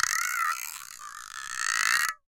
Indonesian instrument spinning from distant to close and distant again. It was recorded in a studio with a matched pair of Newman KM 184 set up and in an A-B pattern in a reflection filter.
instrument, close, erratic, movement, indonesia, up, soft, stereo, spinning, loud